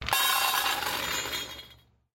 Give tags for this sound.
bowl dog dogs food MUS152 pouring tin

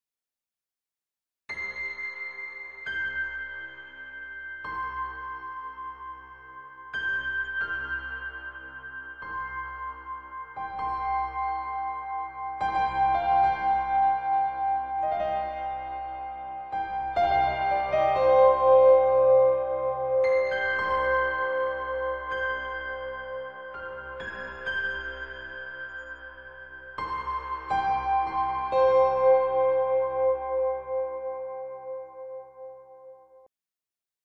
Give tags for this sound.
creepy drama dramatic flourish gothic haunted phantom piano sinister specter spooky unsettling

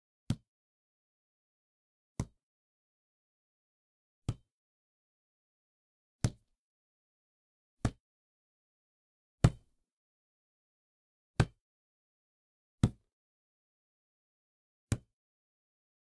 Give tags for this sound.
Panska CZ Volleyball Pansk Sport Czech Hands Bump

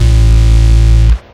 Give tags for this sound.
bass-drum distortion gnp hardcore kick-drum

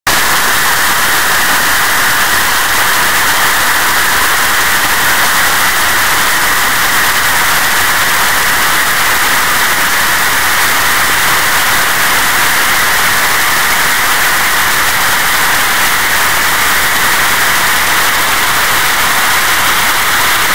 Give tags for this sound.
noise atmosphere phone interference ambient frequency distorsion background-sound effect

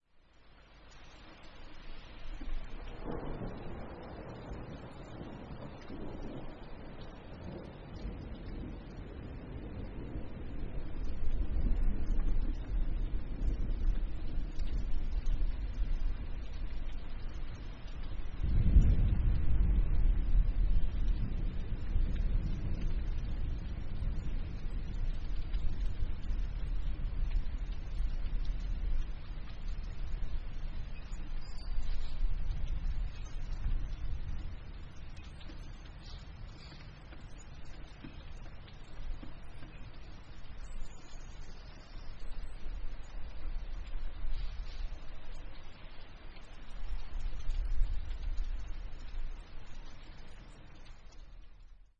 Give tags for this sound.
rain birds dripping churping distant

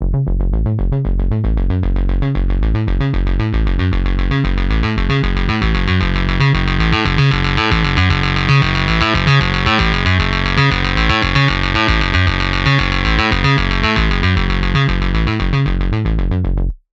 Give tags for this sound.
acid loop bass cyberpunk

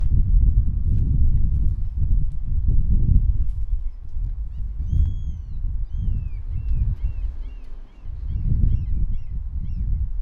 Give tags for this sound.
alvsjo arstaberg field-recording seagulls sony-pcm-d50 sweden train wikiGong wind

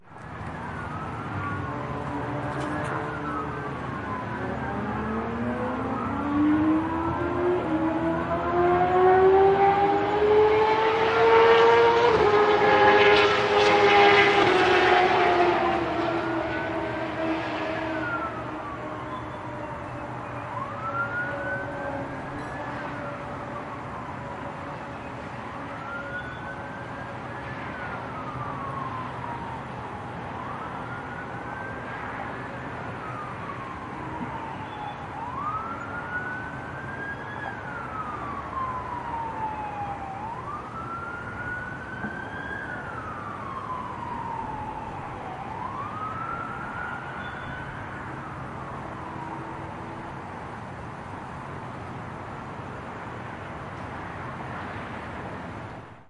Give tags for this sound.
car chase cops engine-revving high-speed-chase police rev speeding sports-car

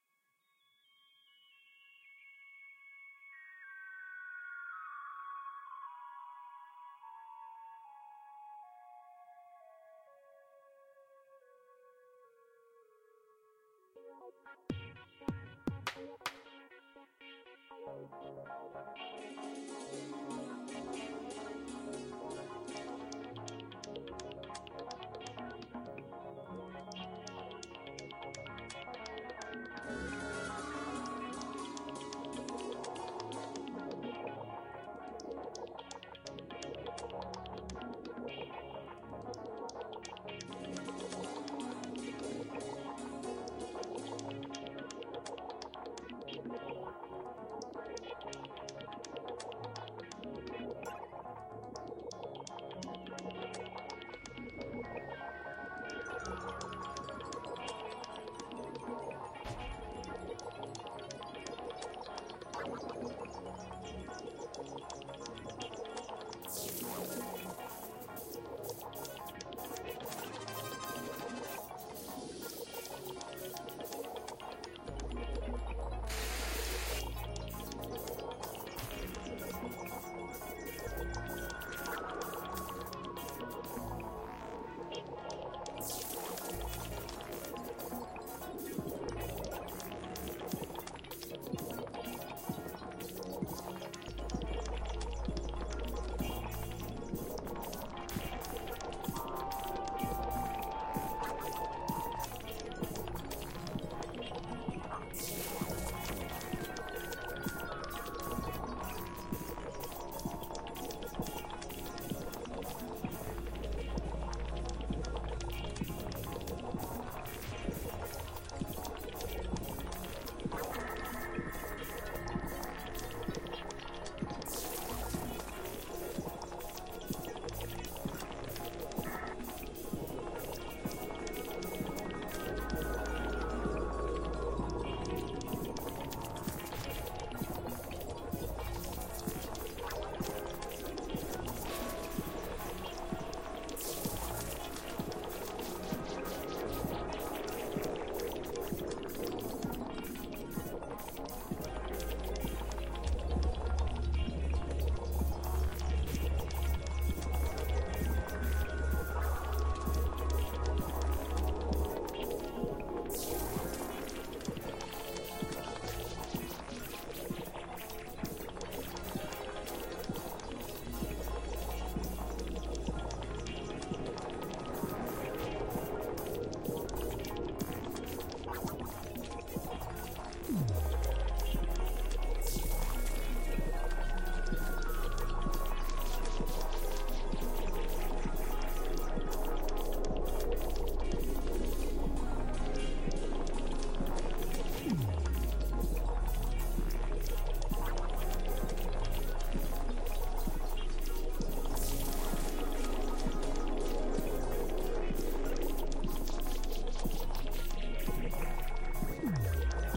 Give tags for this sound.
aquarium dark expression freaky loop noise noises shark sound sounds soundscape subliminal symphoid water

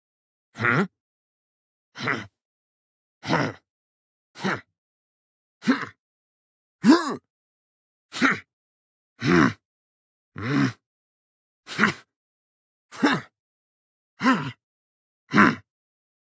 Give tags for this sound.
adult angry grumpy grunt hmm hmph human insulted male man upset vocal voice

